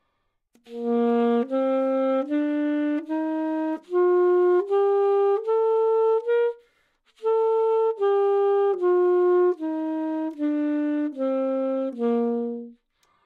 Sax Alto - A# Major
Part of the Good-sounds dataset of monophonic instrumental sounds.
instrument::sax_alto
note::A#
good-sounds-id::6803
mode::major
sax, neumann-U87, scale, alto, AsharpMajor, good-sounds